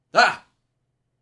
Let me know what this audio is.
male shout 02
male shouting sound effect